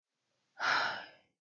20-Suspiro-consolidated
Sigh, Breathing, Air